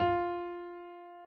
FA stretched
Simple keyboard/piano sound
ti, keys, Piano, so, keyboard, fa, re